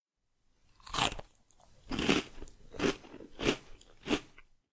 Chewing Crunch Cornflakes Knuspern Schnurpsen Eating Essen Munch
chew, chewing, Cornflakes, crunch, eating, Knuspern, munch, Schnurpsen, snack